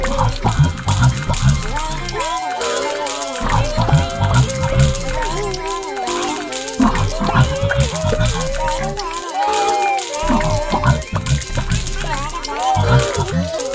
Ever wonder where your Garden Gnome went? Well him and about twenty others have been hangin' out in my back yard. And this is the kinda crap I gotta listen to every evening just when I'm getting ready to go to sleep.
If you would like to check out my original music it is available here:
TRAXIS on Band Camp